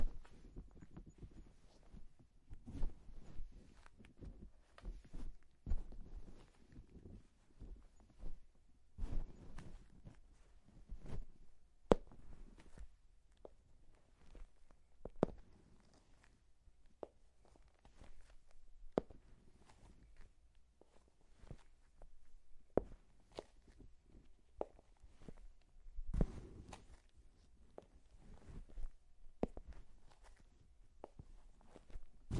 Tiptoeing over both linoleum and carpet.
tiptoe, walking, soft, shoes, steps, sneak, footstep, tile, floor, linoleum, carpet, walk, sneakers, footsteps